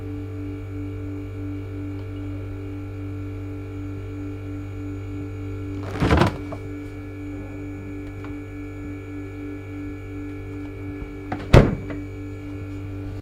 fridge open and close with hum
A fridge door being opened and closed, the fridge is humming too.
food, fridge